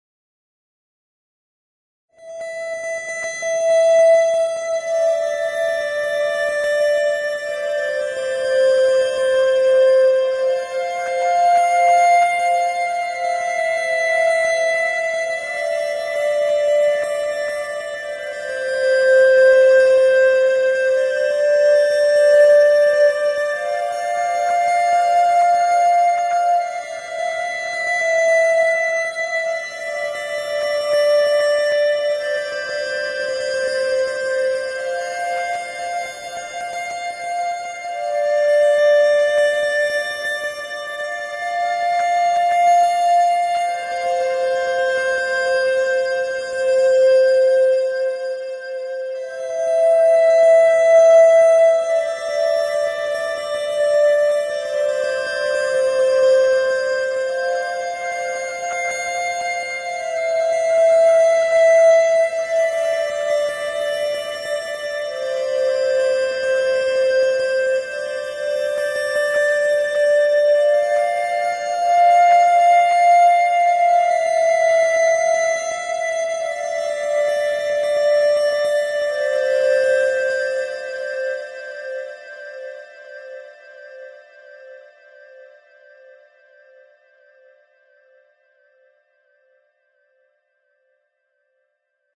This is the background music that I have created for my first game in GarageBand with String Movements. It can work best when you include it with dark and gloomy themed scenes for a movie or a game. I would love to know where you will be using it!